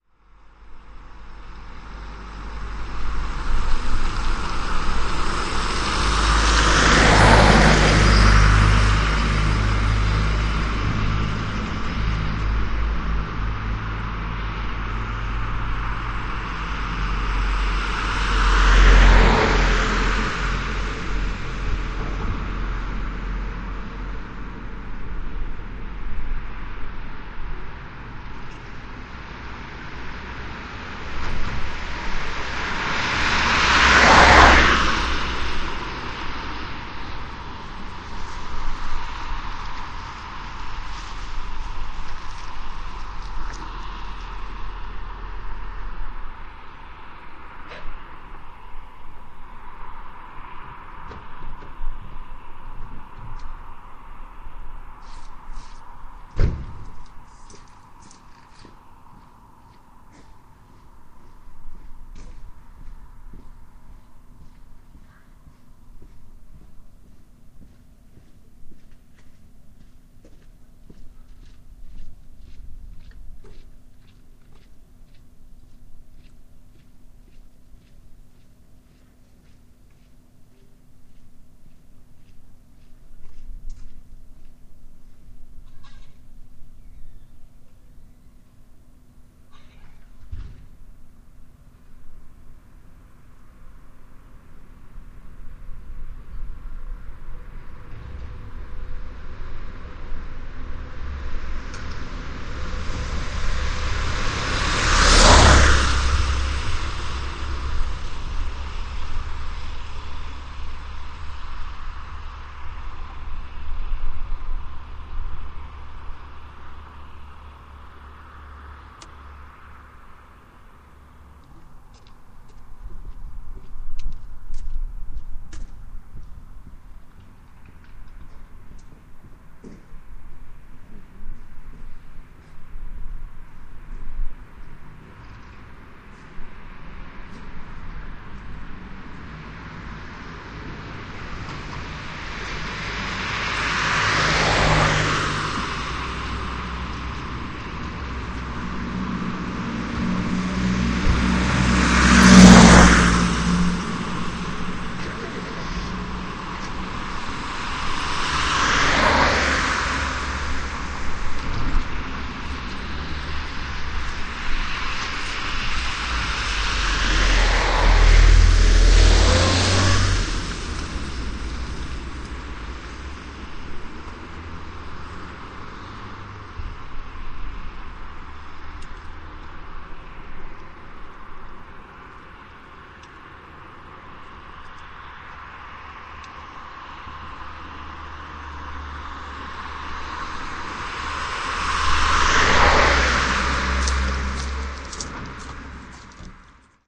Passing cars
This was recorded in Nr. Nebel, a very small danish city in western jutland. It's simply a recording of mainly cars passing, and some pedestrians in the background.
It's a bit of an experiment also. I was tired of the windshields on my two small microphones on my PR1 portable digital recorder. Too much wind have destroyed way too many recordings, so i decided to do something about it. I found an old foam madras, and cut out two new and bigger windscreens. It helped!
Also i tried to have the microphones point in opposite directions with a full 180 degree difference. I got the result i wanted, a very clear difference in consending an descending (i hope i'm making sense) audio, between the two channels. Great when recording things passing by.